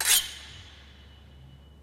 Sword Slice 12
Twelfth recording of sword in large enclosed space slicing through body or against another metal weapon.
sword-slash, movie, sword, foley, slice, slash